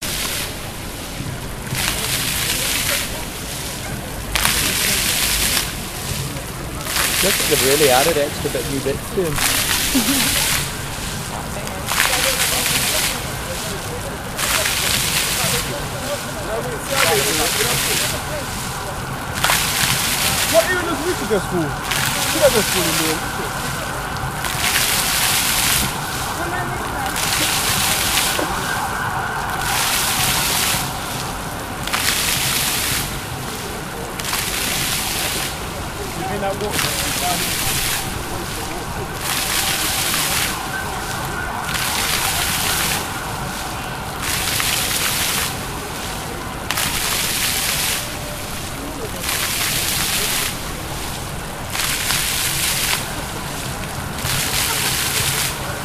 130926-005 Leicester Square Fountain
Sept 2013 recording of traffic at Leicester Square Fountain, London.
Part of an architectural student project investigating the city.
chatting, London, water